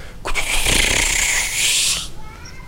Cameroon AT TE 02 coutchouu trrrrrmmmmm
Cameroon, Texture, Foumban